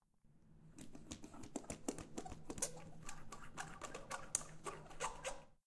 This is a pinecone scraped down the wooden banister of a spiral staircase in a library